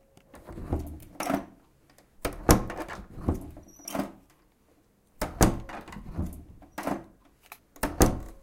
We will use this sounds to create a sound postcard.
barcelona, sonicsnaps, sonsdebarcelona, spain